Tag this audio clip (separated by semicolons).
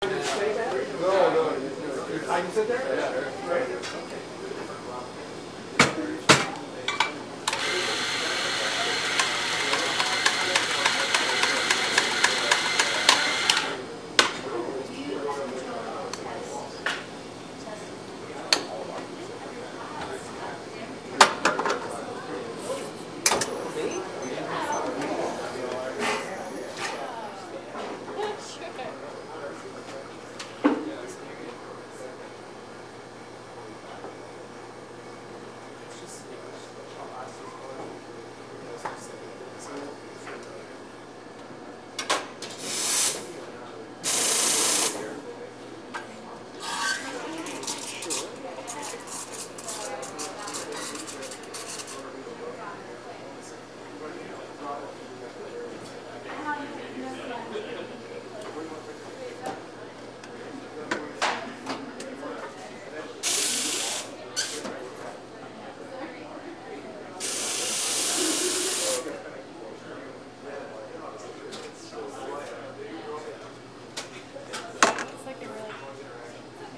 espresso; shop; coffee; barista; cappucino